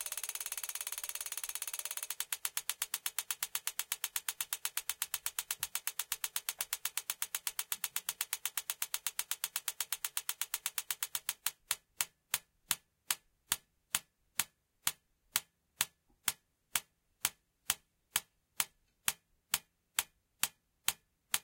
Strobe click
The noise of a strobe light.Recorded with a Zoom H2n.
Normalized and noise reduction with Audacity.
electricity, Sound-effect, machine, strobe